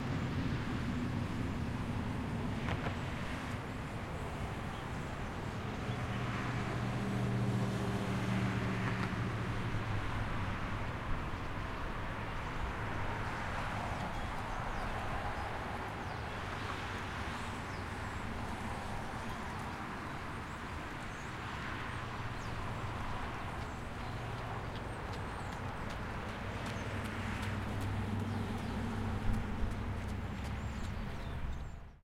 City ambiance captured at the side of a street. A man runs by while light traffic passes.